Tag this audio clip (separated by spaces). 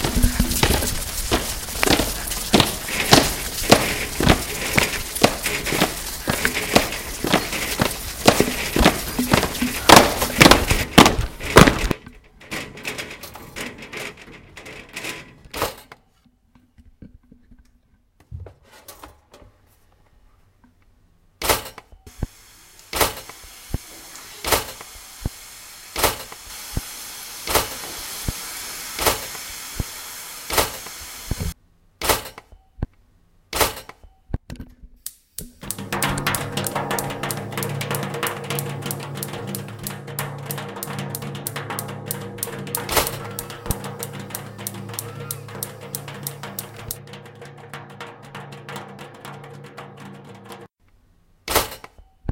France Pac